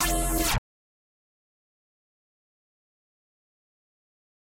Sween Unwrap 1 (Short)
A short sound that is similar to, but not quite, the scratching of records performed by DJs, played for a very short period of time.
fake, record, scratch, sween, vinyl